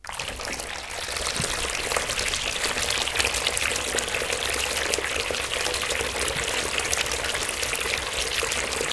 Water from bathtap
flowing, water